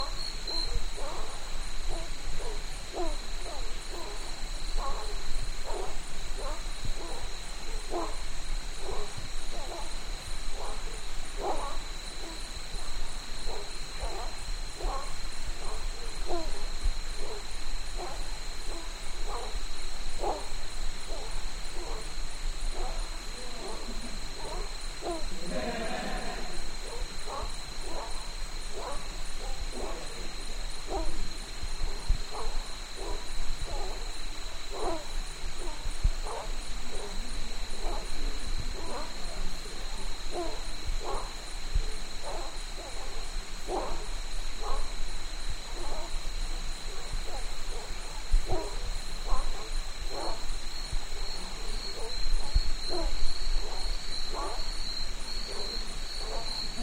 Frogs Night Jungle
Asia, Cambodia, East, Gibbon, Jungle, Nature, South